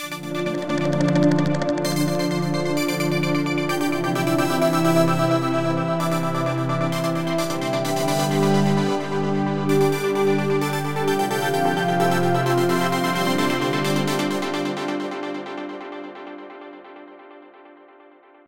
Just a short tune made in Ableton...
synth, sequence, tune, progression, melody, trance